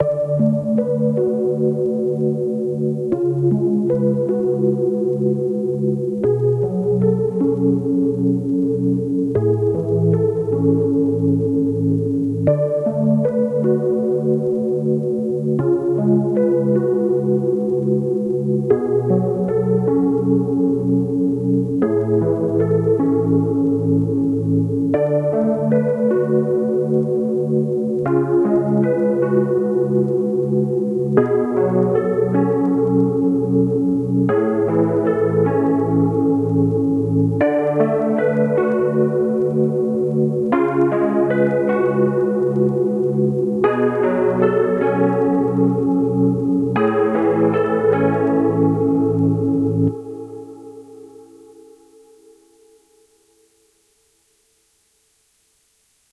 I don't beleve (wurlitzer)
wurlitzer theme that I play, sound is melow and old
theme, wurlitzer